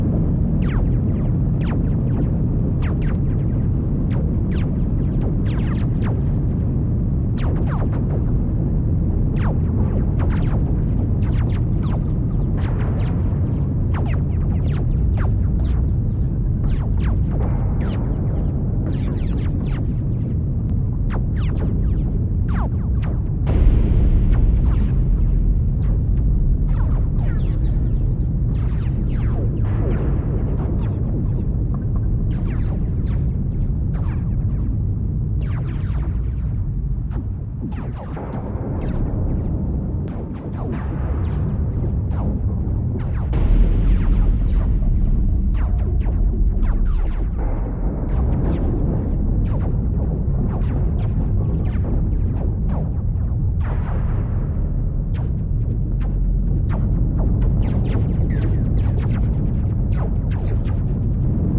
Distant Battlefield Soundscape
Massive blasts, explosions, distant lasers. Low huge rumble.
Used arsenal: Laser (Synth)
Medium blast (Factory machine rec)
Big Low Blast (edited bass drum)
Low Rumble (processed heavy train)
Low rumble 2 (processed field recording)
Loop
This sound is looped which means it seamlessly starts again after ending, but you have to declick the very 1st part.
laser, bass, modern, gun, shot, fight, battlefield, artillery, low, weapons, war, loop, cannon, blasts, distant, explosion, lasers, warfare, far, boom, rumble, battle